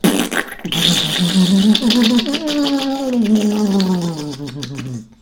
A sound you make when you clean your teeth.